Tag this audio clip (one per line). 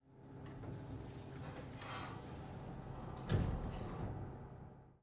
mechanical
lift
close
elevator
door
closing
sliding
open
opening